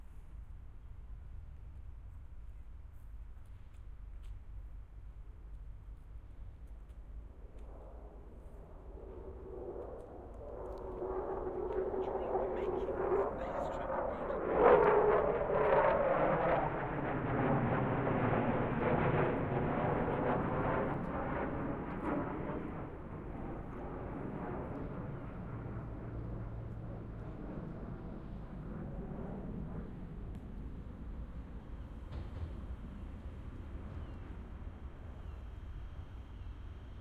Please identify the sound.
Norwegian fighter jet passing over me as i was doing binaural recordings at a train station.